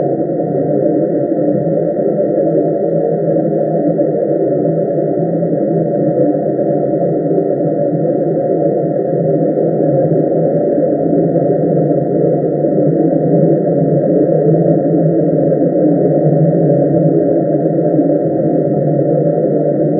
BGvesselNoise4+5 Choral
Simply a direct mix (blend) of BGvesselNoise4_Choral and BGvesselNoise5_Choral (the latter was resampled to fit the length of the former exactly, since these are seamless loops and the result is also to be a seamless loop). See descriptions of those for more detail. This is here just to give you more options. Created in cool edit pro.
ambient, background, choral, engine, noise, synthetic, vessel, voices